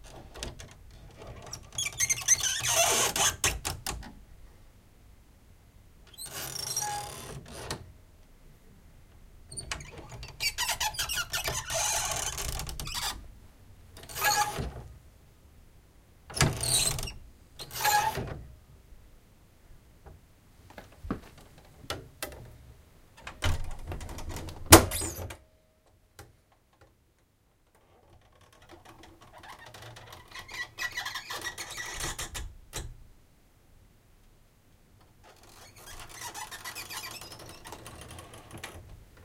Turning door handle
As soon as I got back home I decided to record some more sounds for dare-12. These are sounds of my house that I have been wanting to record for a long time.
This is the sound of slowly turning the door handle on a door in my house. It can make quite an irritating squeaking sound. I turned the door handle much slower than I normally would for maximum effect.
Recorded with a handheld Zoom H1. 10cm away from the door handle, using the recorder's built in mics.